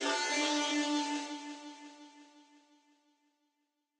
Industrial Bow
A digital bow noise made in fl studio 12
horror, sci-fi, alien, industrial, texture, dark, drone, pad, stringed, soundesign, fx, creepy, bow, horn, effect